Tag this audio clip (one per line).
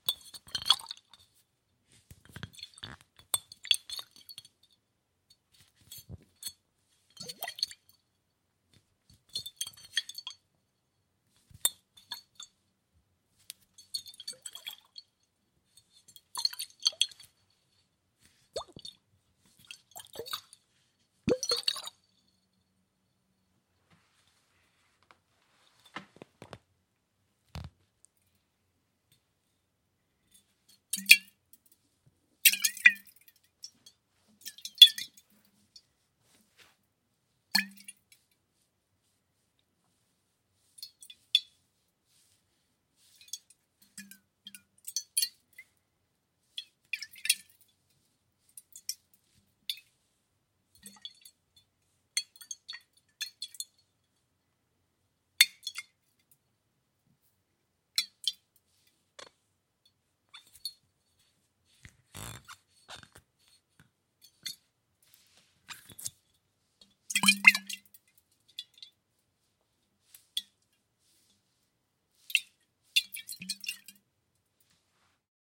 bottle
liquor
slosh
sloshing